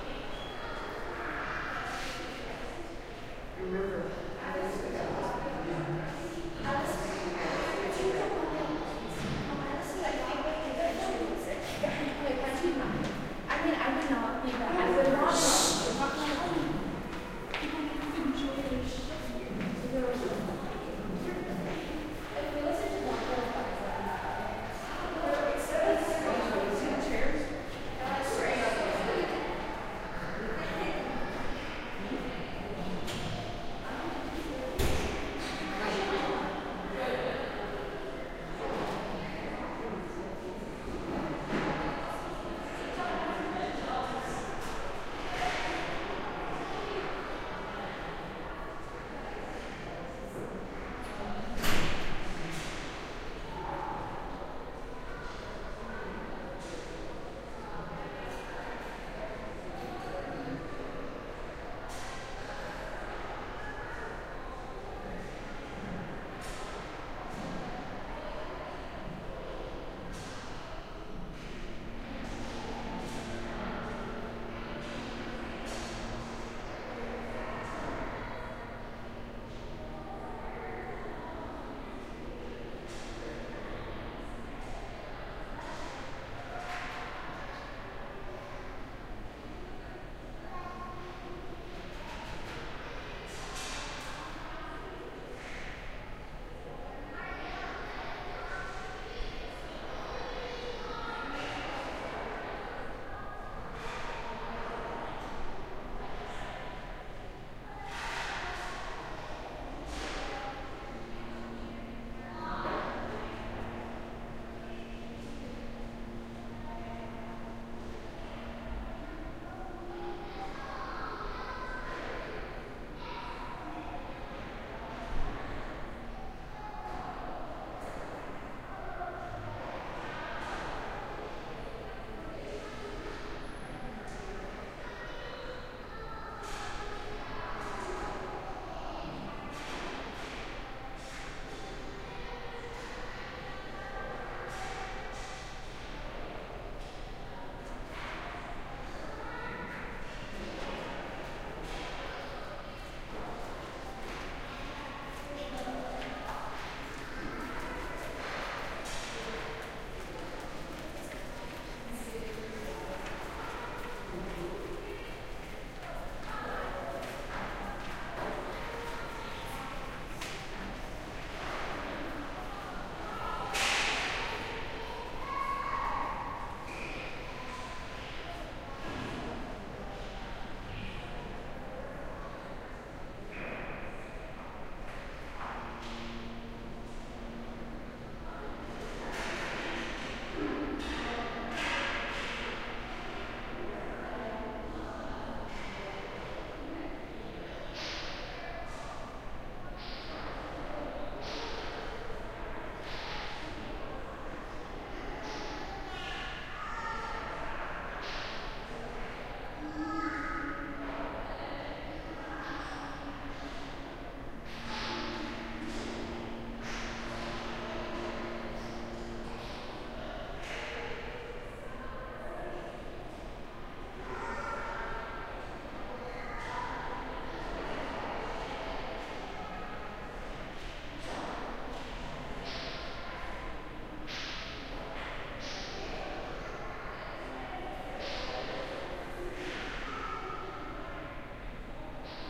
Museum, Children's Play Area 1
airy, ambience, art, chatter, children, echo, gallery, museum, people, play, room